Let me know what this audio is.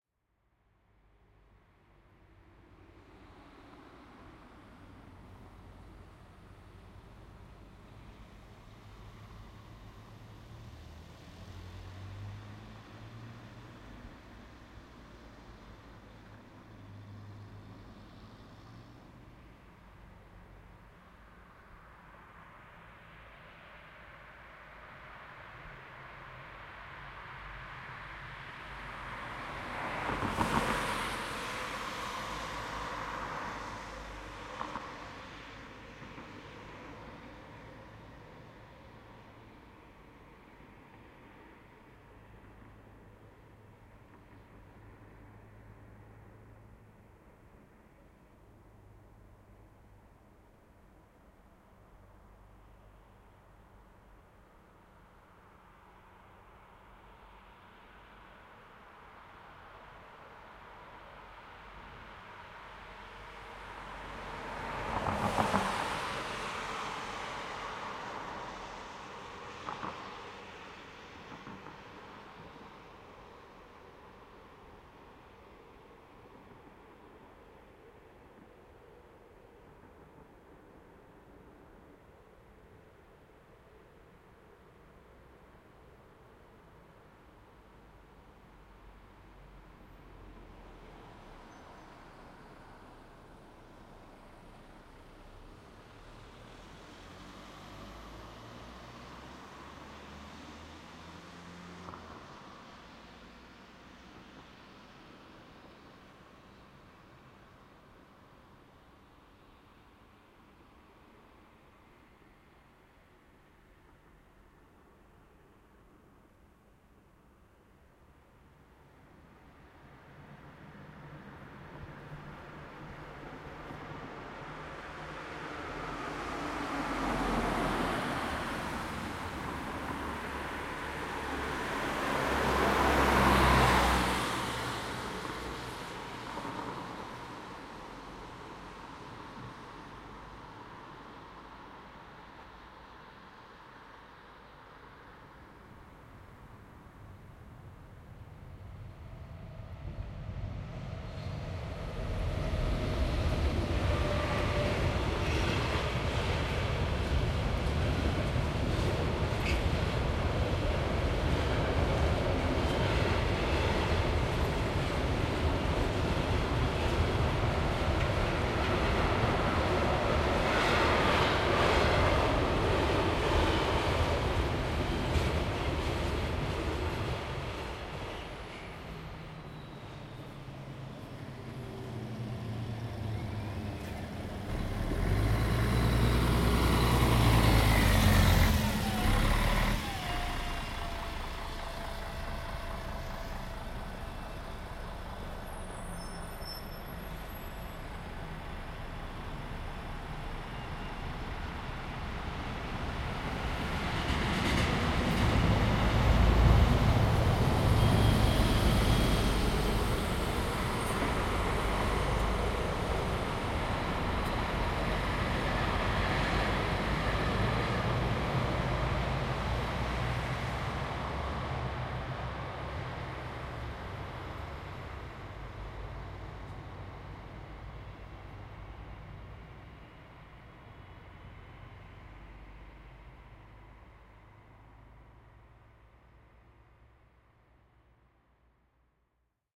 Night Traffic
A few minutes of sparse nighttime traffic in an empty, otherwise quiet street. A few single cars driving by in some distance, followed by a train and a bus... somewhat relaxing.